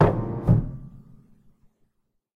Piano, Pedal Depressed, Damp, A
Raw audio created by quickly pressing and releasing the sustain pedal of a baby grand piano, creating this quick, blurred mush of string vibrations.
I've uploaded this as a free sample for you to use, but do please also check out the full library I created.
An example of how you might credit is by putting this in the description/credits:
The sound was recorded using a "H1 Zoom recorder" on 8th June 2017.
damp, damped, depressed, pedal, piano, pressed, push, pushed, sustain